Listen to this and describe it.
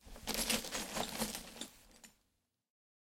crawling-broken-glass011
Bunch of sounds I made on trying to imitate de sound effects on a (painful) scene of a videogame.
sound-effect, glass, crawling